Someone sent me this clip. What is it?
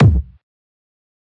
kick dnb medium
made by mixing synthesized sounds and self-recorded samples, compressed and EQ'd.
fat, drum-n-bass, kick, dnb